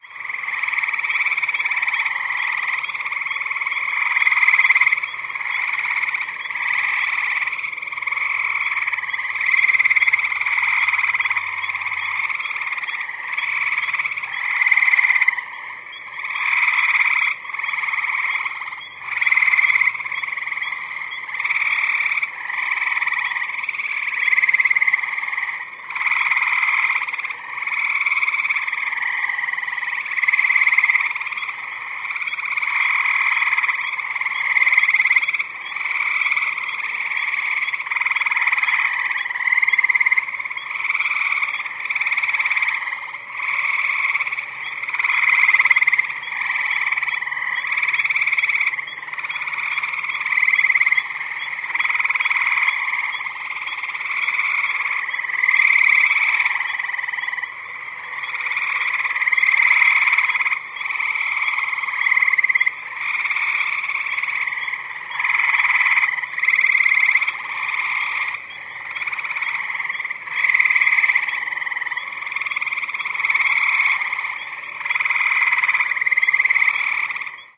Tree frogs, medium close perspective, good stereo effect. A few spring peepers and an occasional green frog in the background. Recorded in southeastern Michigan, U.S.
field-recording, frogs, tree-frogs, nature